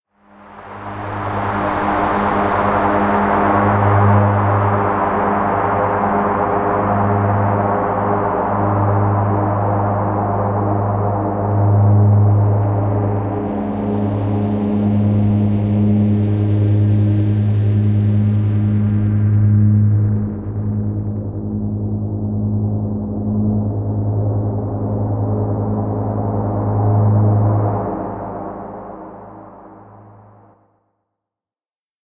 After the bombing
sound of nightmare and horror
War, Ambient, Atmosphere, Nightmare, Horror, Drone, Movie